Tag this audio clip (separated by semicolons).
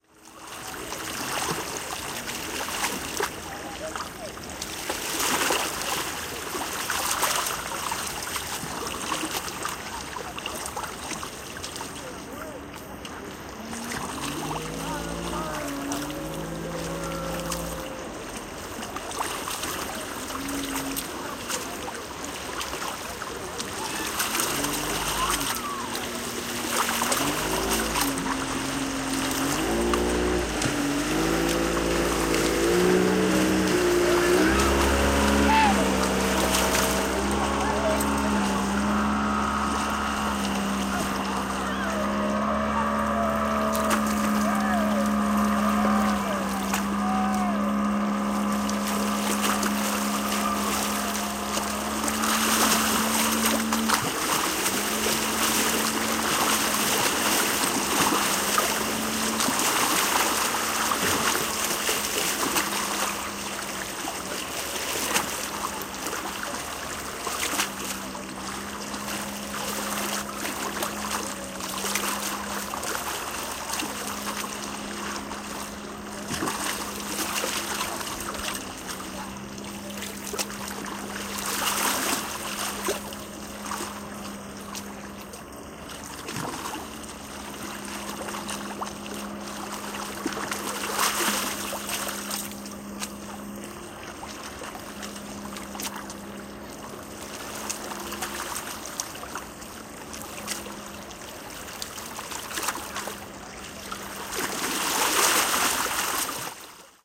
seashore; tunisia; beach